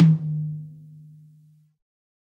High Tom Of God Wet 002
drum, drumset, high, kit, pack, realistic, set, tom